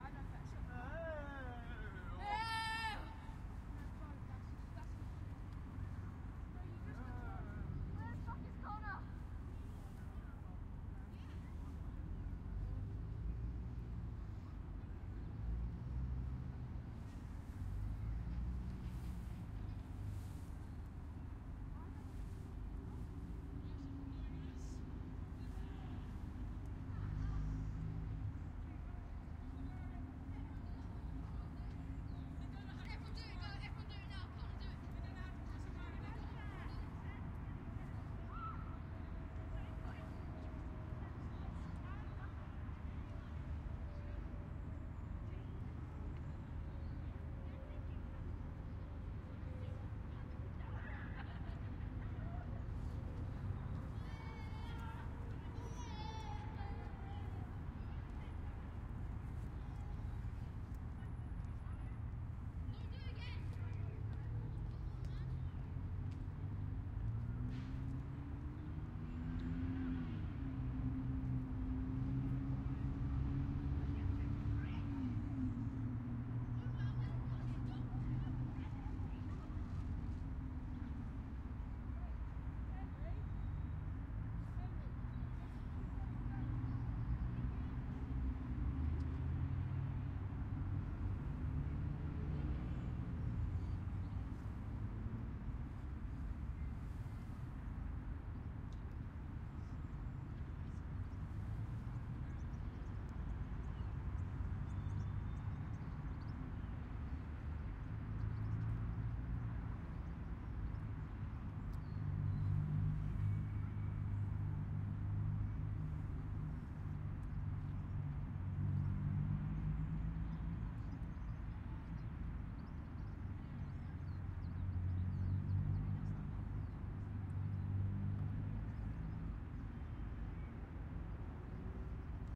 Greenwich London UK Ambience 02
This is a stereo recording of a city ambience in Greenwich, London, UK. This was recorded during the day and there is a very active flight path overhead.
These are raw unedited wavs and so will need some spit and polish before use. Keep an eye out for aircraft!
There are kids playing about in the background on this particular recording.
kids, uk, urban, suburban